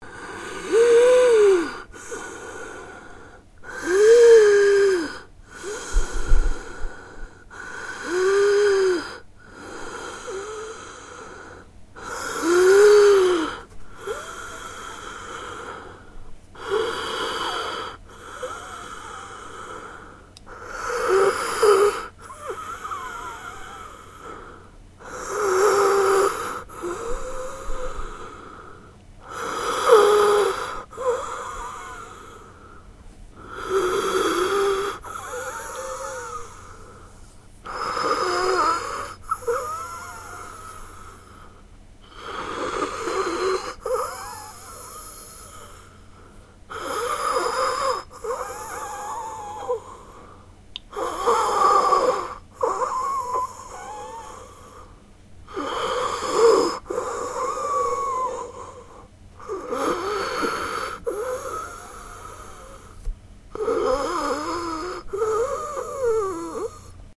Heavy Breath Wheezing
Breath, Breathing, Wheezing